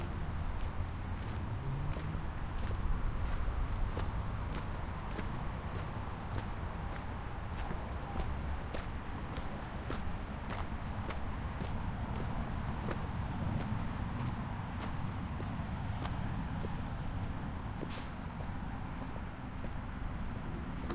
footsteps in dirt near freeway
walking in rubber boots thru dirt near freeway and LA river
recorded on zoom h4n
boots, dirt, footsteps, freeway, LA, river, road, walking